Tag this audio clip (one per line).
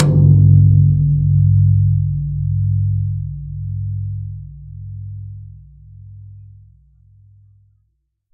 1-shot
drum
multisample
velocity